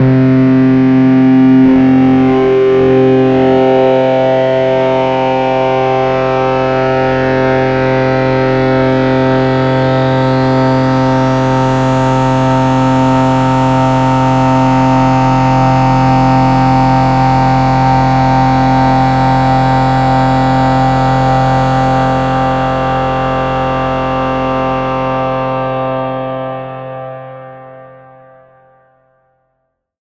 THE REAL VIRUS 01 - HARD FILTER SWEEP LEAD DISTOLANIA - C3
THE REAL VIRUS 01 - HARD FILTER SWEEP LEAD DISTOLANIA is a multisample created with my Access Virus TI, a fabulously sounding synth! Is is a hard distorted sound with a filter sweep. An excellent lead sound. Quite harsh, not for sensitive people. Enjoy!
lead, distorted, solo, hard, harsh, multi-sample